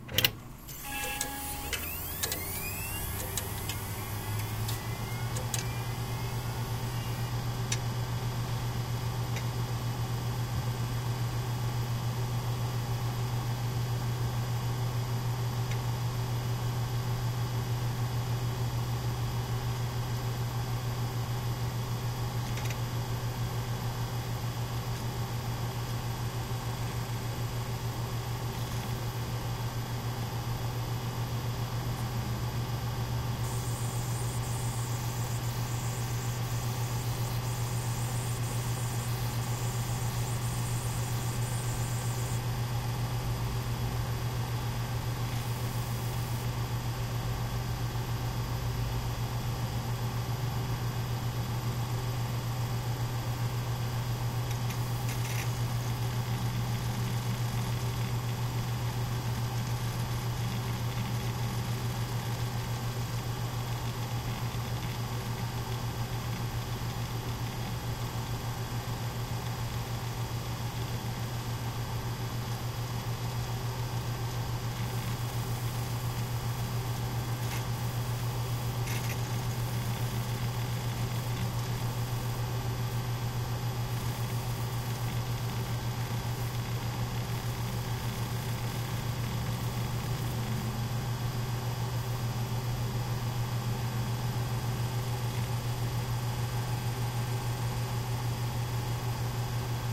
Click the start button and computer starting.
System have 4 HD (Seagate) and Zalman system fun.